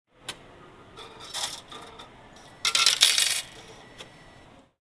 Foley recording of 4 coins dropping into the return slot of a money changer. Recorded in a subway station in Gwangju, South Korea.